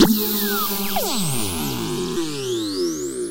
LEAD IN
techno noise space scooter
noise, techno